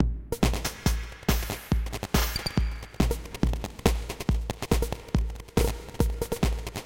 drum glitch
Glitchy/Noisy drum loop, made with hand drawn samples in Renoise (tracker) and with various included effects (delay, etc)
drum, drum-loop, drumloop, loop, noisy